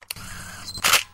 Camera Shutter
This is the sound of my Nikon D90 with an 18-55mm f3.5-5.6 lens (if you really want to know :p)taking a picture.
camera, click, d90, dslr, nikon, shutter